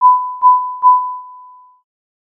short FM generated tones with a percussive envelope
electronic, fm, synth, tones